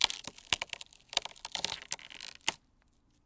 Slowly breaking a wooden plank, placing emphasis on every small splinter of wood tearing apart.